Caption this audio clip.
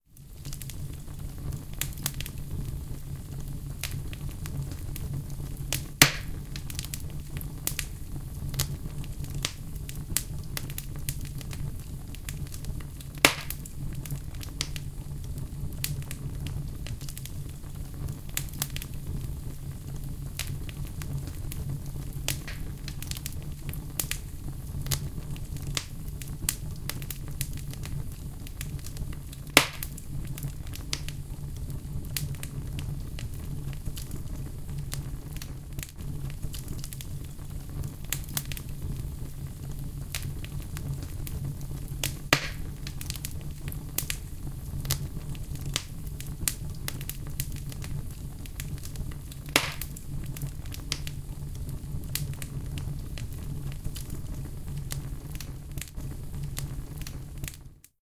crackling-fireplace-nature-sounds-8012
Fire, crackle, burning, soun, crackling